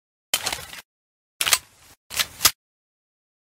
mp7 reload sound
reload sound of the mp7 smg
mp7, reload, rifle